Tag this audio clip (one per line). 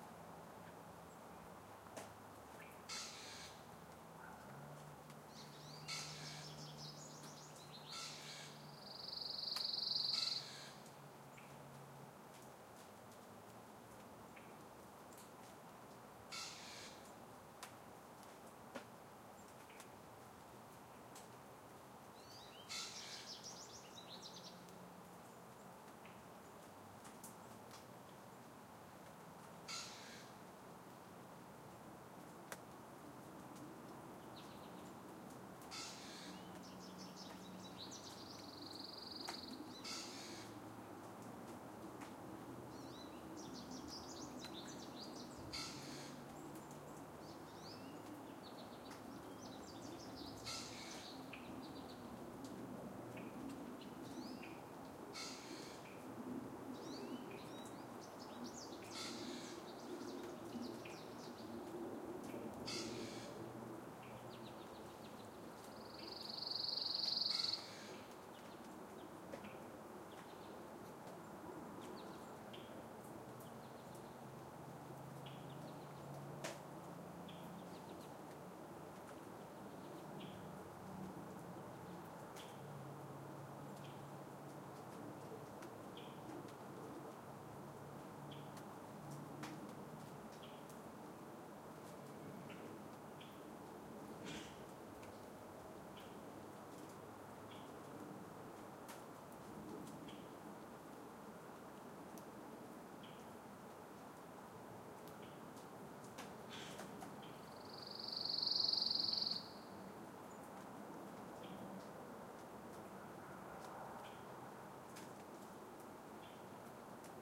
ambiance
bird
new-england
rain
rhode-island
rural
spring